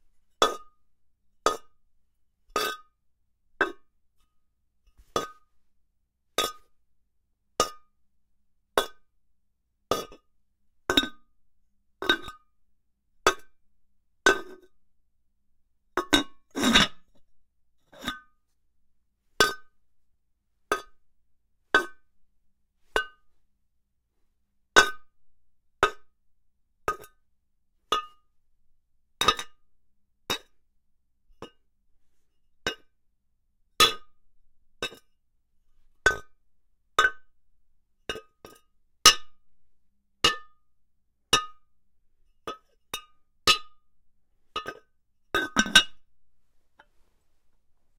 ceramic clay pot lid hits
ceramic, lid, pot, hits, clay